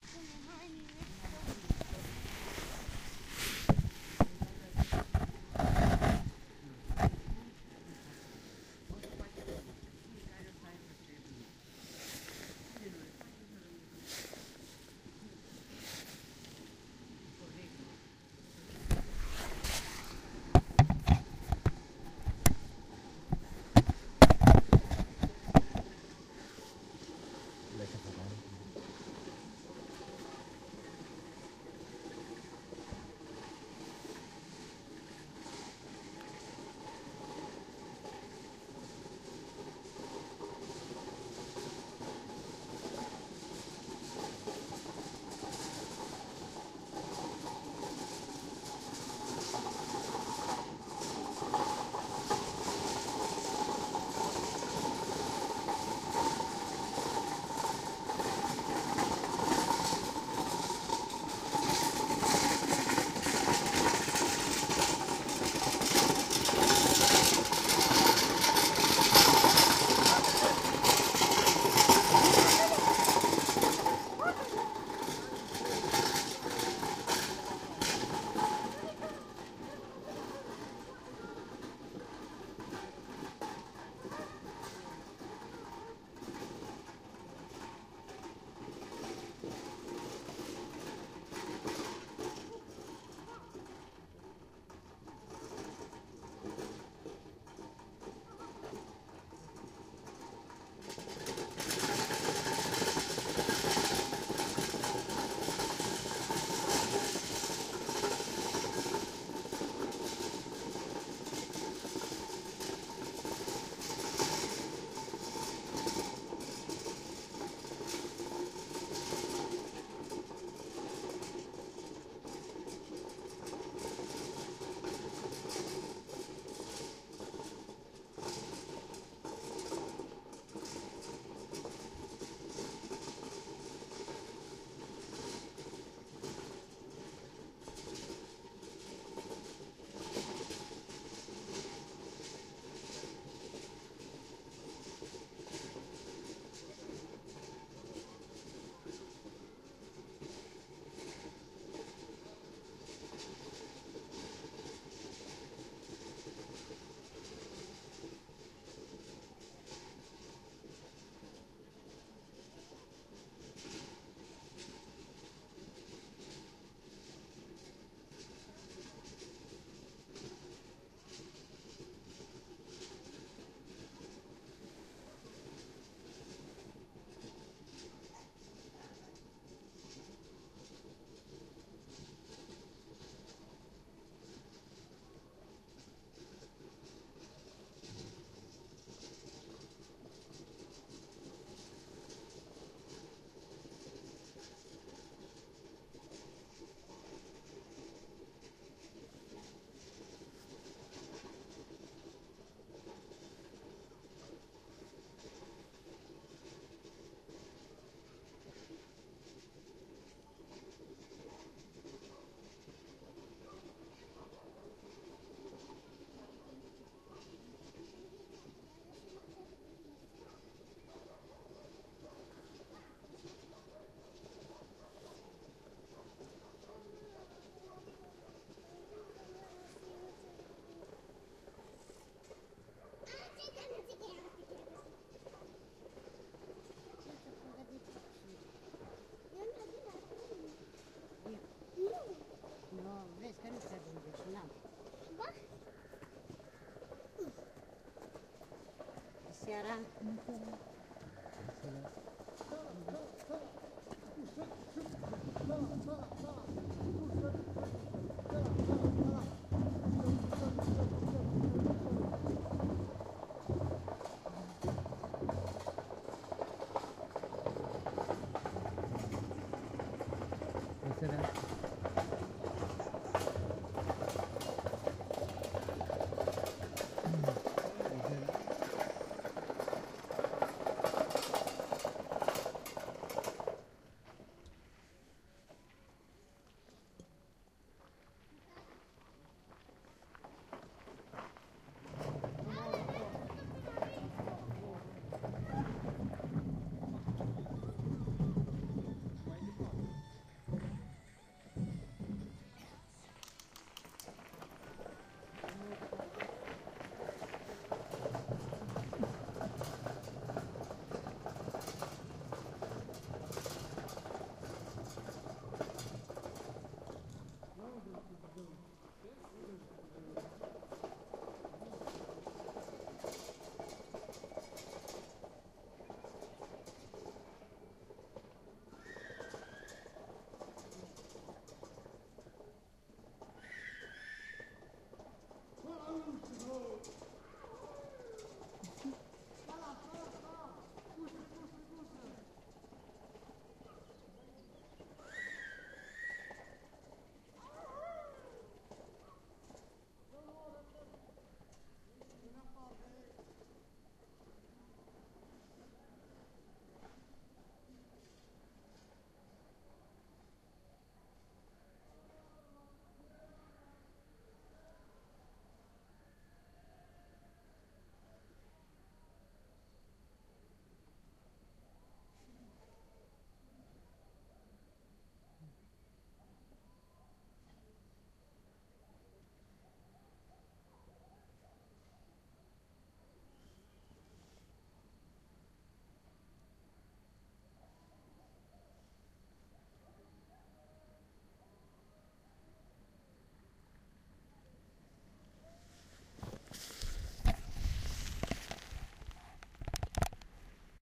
Milkmaids (lecheras)
La gente vuelve con las lecheras vacias hacia la granja en Viscri.
People return with empty milkmaids towards the farm in Viscri.
milkmaids, Romania, town, village, Viscri